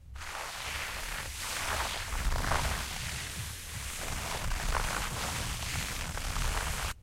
rustle.Carpet Scratch 1
recordings of various rustling sounds with a stereo Audio Technica 853A